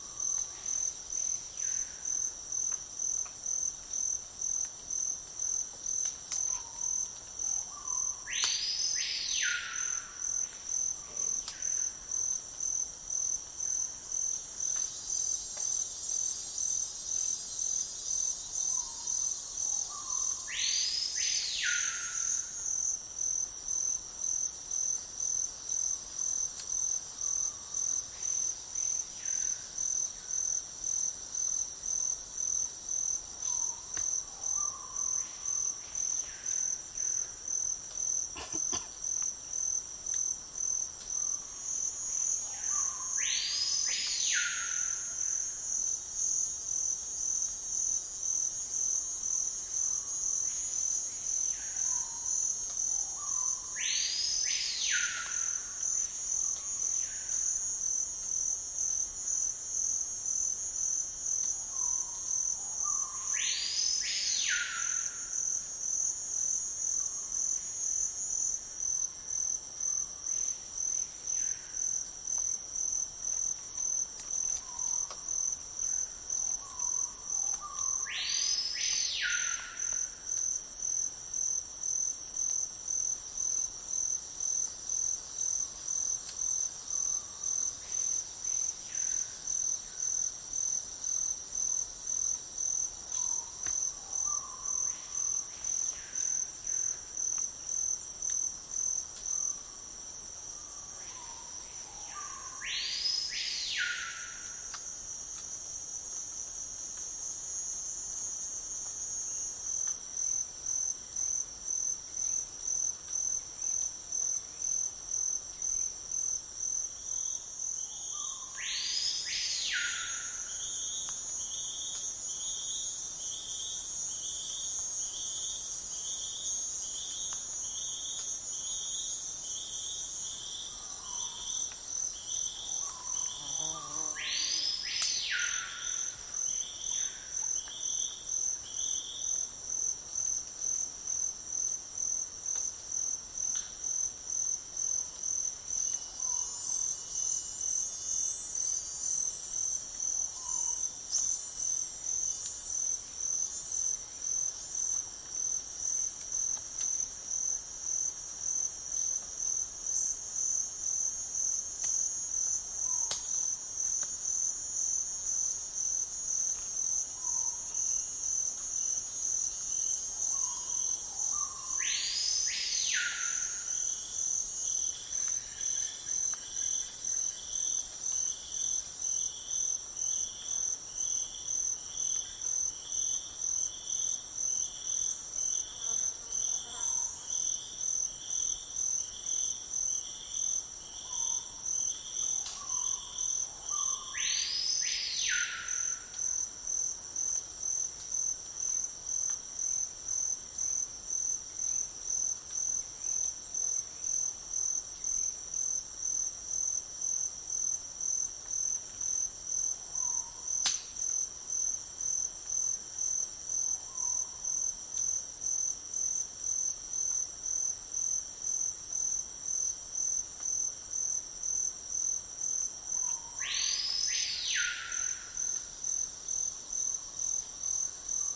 Amazon jungle day amazing light exotic crickets birds echo +occasional bg voices and hot cicadas stereo loopable
crickets, Amazon, birds, jungle, day